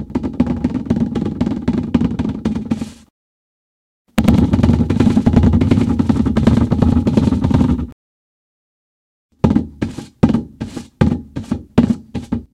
some simple drum beats for your music composition toolbox, maybe...
bits, fragments